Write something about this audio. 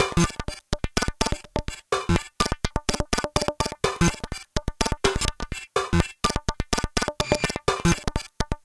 125BPM Drum loop - circuit bent Casio synth - processed
125 bpm drum loop made out of a circuit bent Casio synth recording.
Some compression and a short delay (with high feedback) added to make the sound more tonal.
beat, glitch, panning, diy, electronic, circuitry, bent, bpm, 125bpm, hard, bending, noise, industrial, techno, casio, distorted, synth, loop, circuit, drum, left, 125, right, drums